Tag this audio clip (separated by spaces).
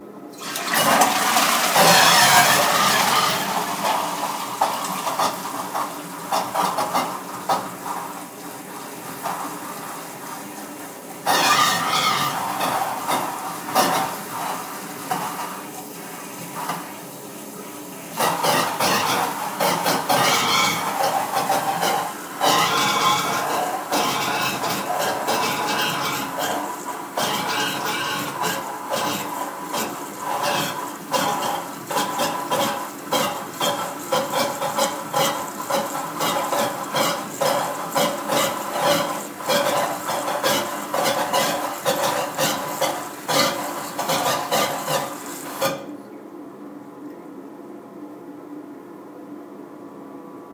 bowl flush old toilet water WC